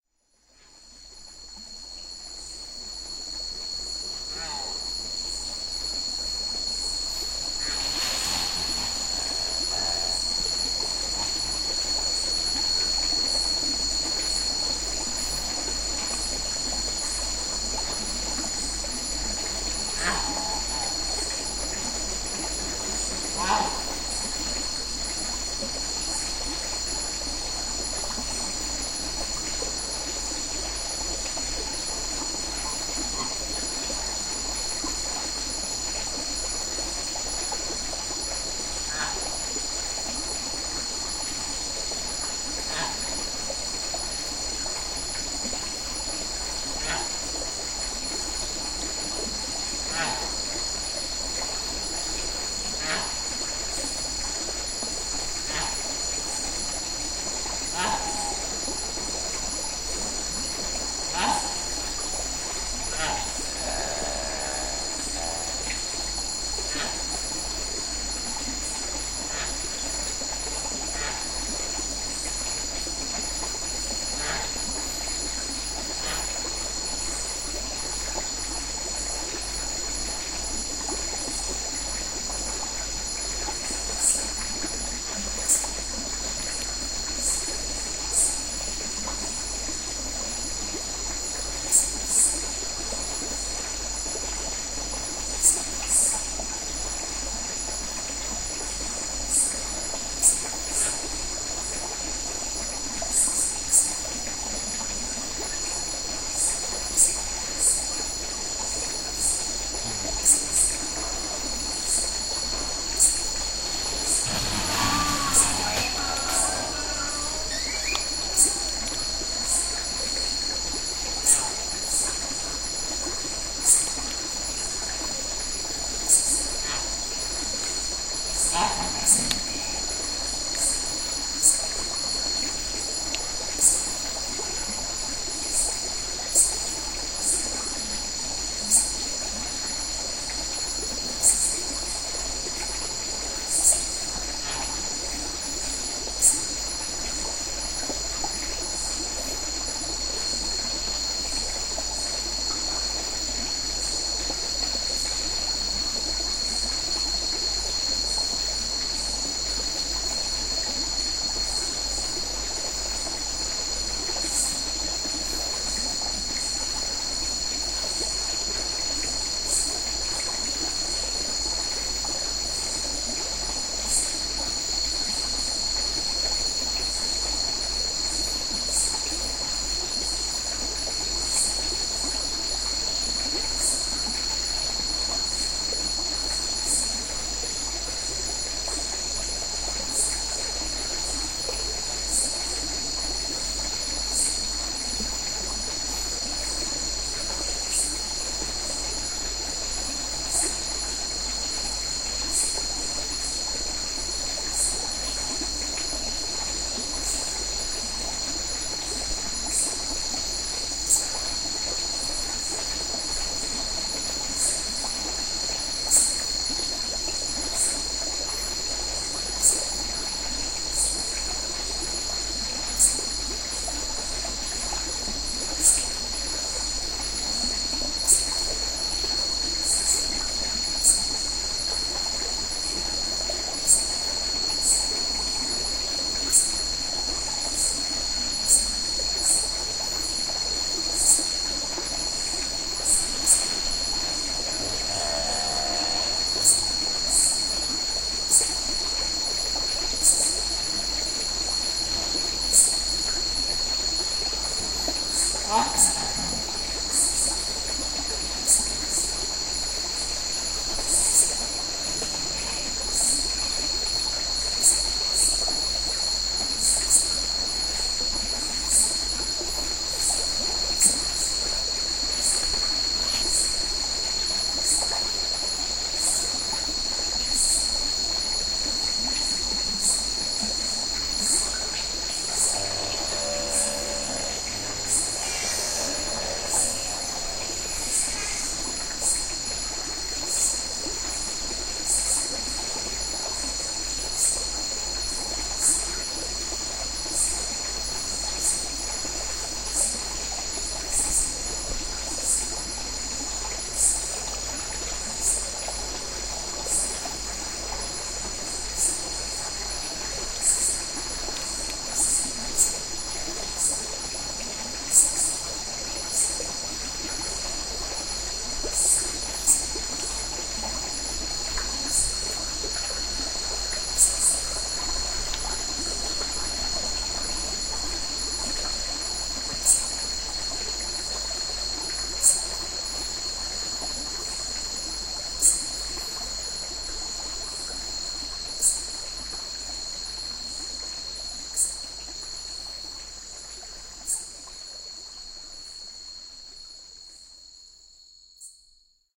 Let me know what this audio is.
Borneo Jungle - Night
Night-time field recording made in Tanjung Puting National Park, Kalimantan, Borneo, Indonesia. Insects, a small stream, and unseen/unknown creatures moving through the vegetation and calling out are heard.